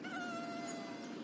short mosquito noise. Recorded near Centro de Visitantes Jose Antonio Valverde (Donana, S Spain) using Sennheiser MKH60 + MKH30 > Shure FP24 > Edirol R09 recorder, decoded to mid/side stereo with Voxengo free VST plugin
donana, field-recording, insect, marsh, mosquito, nature, south-spain